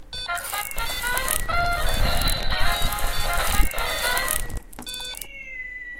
SoundScape GPSUK dila sharif oliver 5W
cityrings, galliard, soundscape